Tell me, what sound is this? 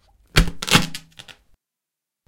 Balloon-Strain-03
Strain on an inflated balloon. Recorded with Zoom H4
strain, balloon